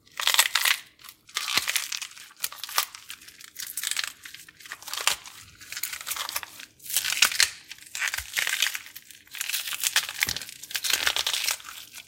Wet Crunching 2
Wet crunching sounds of a bell pepper. Could be used for a zombie eating brains, or maybe some fast growing vines in a cave. Or perhaps stepping on some wet earth. Slightly echo room. Endless opportunities.
Recorded on a Blue Yeti Microphone. Background noise removal.
chew, pepper, eat, interior, zombie, crunch, apple, vines, bell, bite, grow, munch, wet